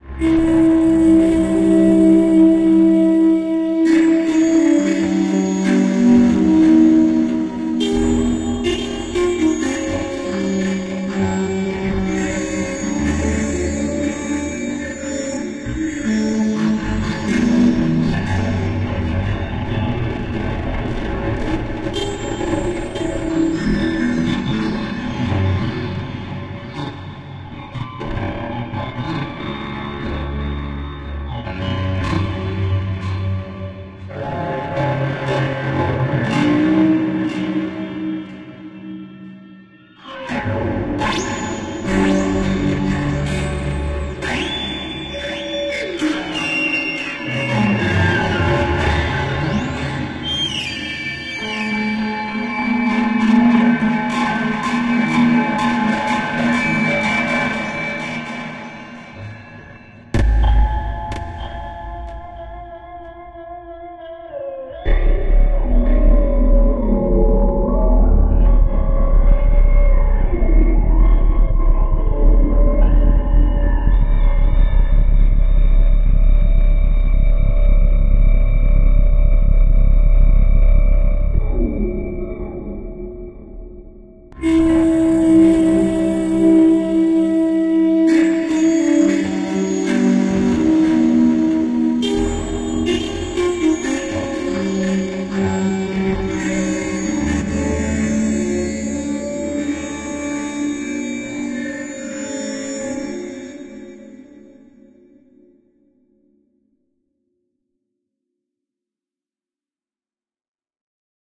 Sweet Unaccompanied Cello
Excerpt from my suite for unaccomplished cello, generated in Zebra, subsequently manipulated in BIAS Peak.
cello, synthesized, scratchy